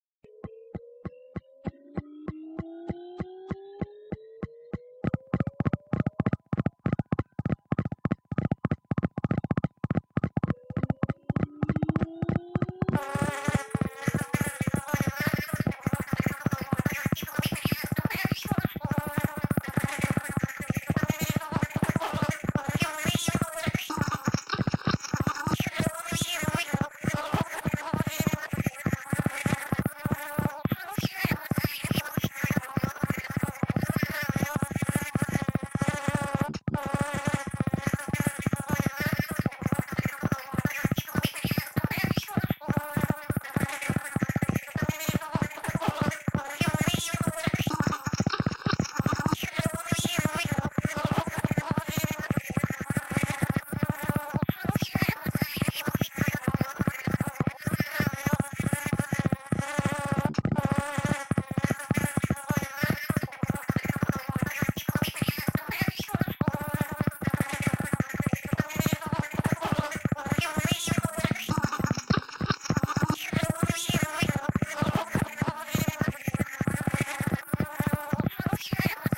novasound200beedances
You listen to what is called a bee dance. When a bee has found flowers that hold abundantly of nectar, it returns to the bee hut with good news. The lucky bee starts dancing i.e. takes small steps and vibrates the wings. The other workers hear the noise and want to know what the cousin got to tell. By touching the Messenger with their antennas and listening to the vibrational 'song' they get information so detailed that they can fly kilometer or two and land exactly where the first be had done the discovery. The taping illustrates the stepping bee dance and the babbling illustrates the transfer of data.
dance, bees, Buzz, vibrate